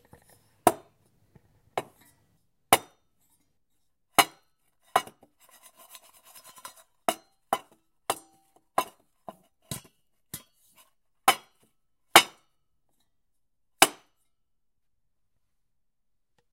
metal clanks
clang, clank, impact, metallic, ting